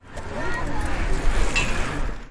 Proyecto SIAS-UAN, trabajo relacionado a la bicicleta como objeto sonoro en contexto de paisaje. Velódromo de Bogotá. Registros realizados por: Jorge Mario Díaz Matajira y Juan Fernando Parra el 6 de marzo de 2020, con grabadores zoom H6 y micrófonos de condensador